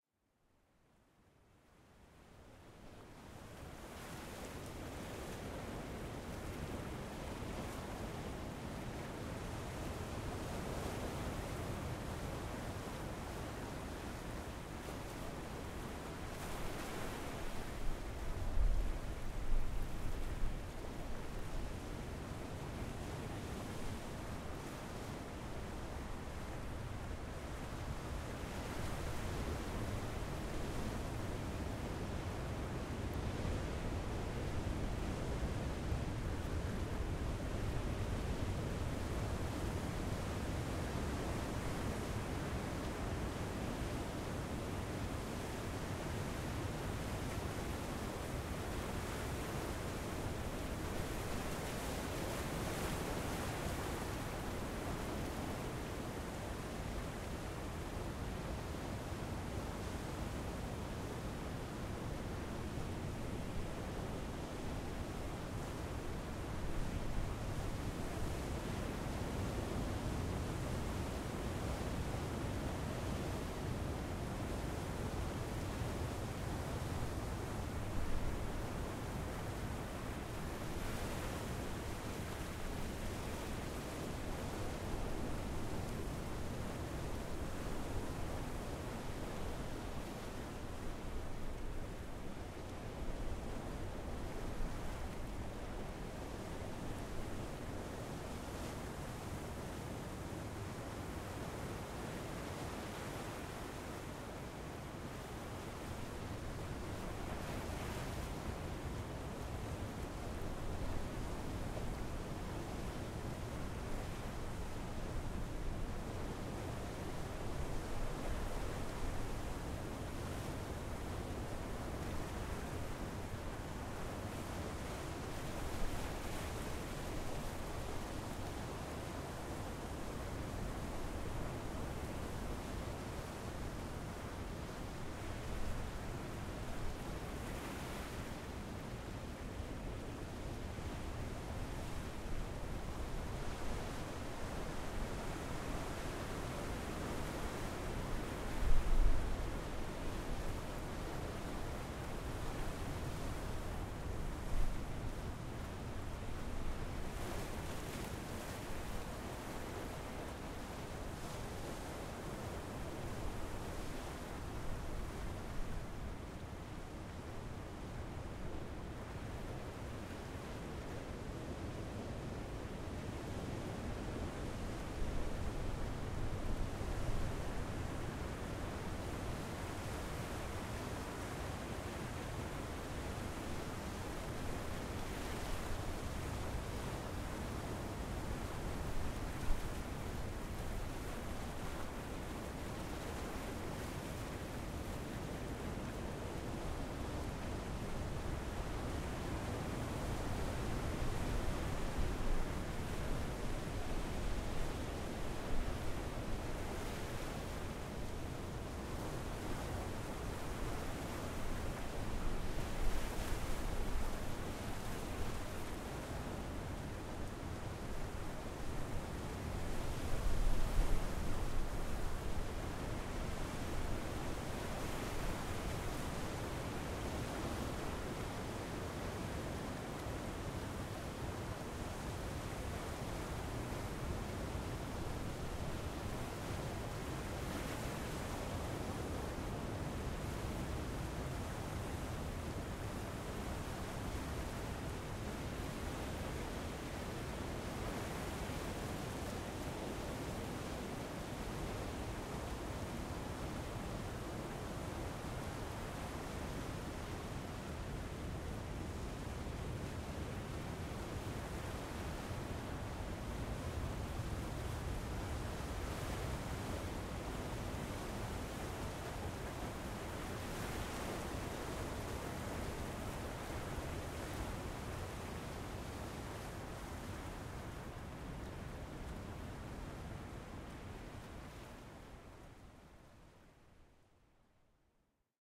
Pacific Ocean @ Chile, Southamerica
Pacific Ocean @ Pichicuy, La Ligua, Chile (South America).
Recording: Neumann TLM102 + Mbox 3, by Ignacio Ramírez from Insigne Estudios.
wave, southamerica, waves, sea, beach, coast, ocean, pacific, seaside, shore, south, water, america, chile